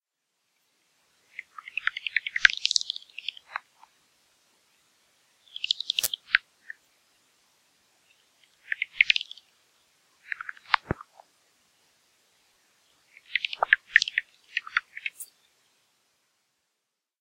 Bats 1st August 2013
bats, location-recording, Nature